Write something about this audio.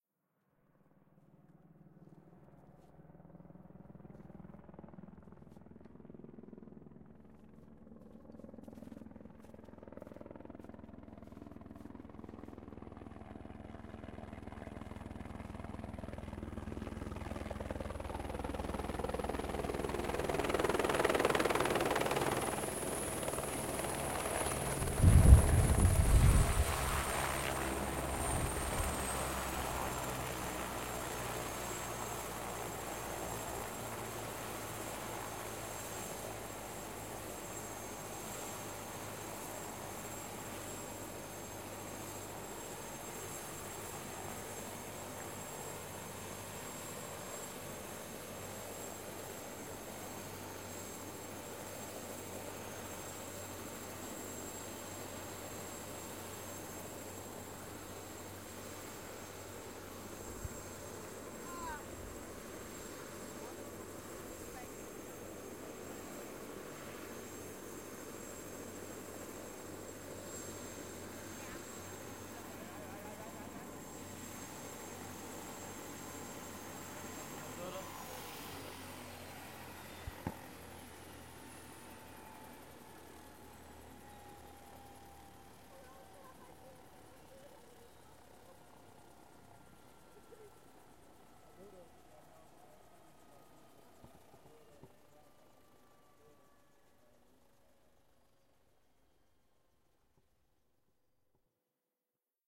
EuroCopter AS350B3 landing
helicopter, landing